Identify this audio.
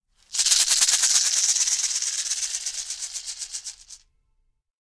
NATIVE SHAKER 02
A native north-American shaker such as those used for ceremonial purposes i.e.; the sweat lodge.